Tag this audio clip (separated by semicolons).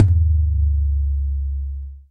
808; drum; drums; machine